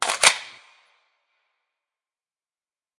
M4 Mag insert 1
A 30 rd magazine being inserted into a Bushmaster's magwell.
Bushmaster, Gun, Gun-FX, M4, Magazine